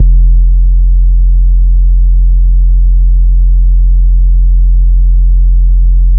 basscapes Subbassonly
a small collection of short basscapes, loopable bass-drones, sub oneshots, deep atmospheres.. suitable in audio/visual compositions in search of deepness (not tail clip when downloaded)
ambience, ambient, atmosphere, backgroung, bass, boom, creepy, dark, deep, drone, electro, experiment, film, horror, illbient, low, pad, rumble, score, soob, soundscape, soundtrack, spooky, sub, suspence